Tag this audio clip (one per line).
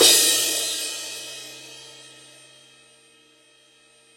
velocity
1-shot
multisample
cymbal